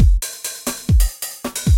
135beat-qS
bpm, loop, beat, Maschine, drumloop, 135